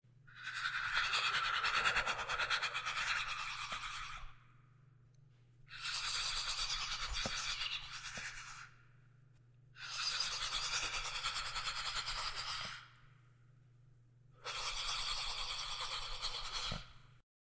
Siseo Hiss
Intended snake his alien like